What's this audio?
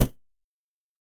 STAB 018 mastered 16 bit from pack 02
A short electronic sound, usefull as percussion sound for a synthetic drum kit. Created with Metaphysical Function from Native
Instruments. Further edited using Cubase SX and mastered using Wavelab.